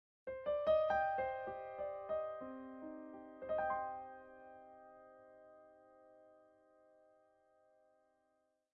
c minor piano 3
C minor piano recorded in DAW with YAMAHA PF-500.100 bpm.
Also would like to spread this idea.
I would just like to get note how it works for you and hear it of course.But it is up to you.
100bpm, c, minor, piano, sample